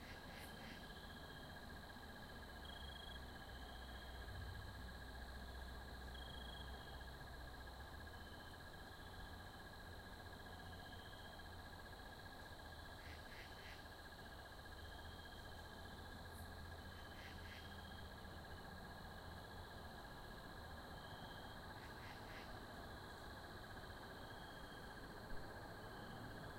Night time ambiance
night, time, ambiance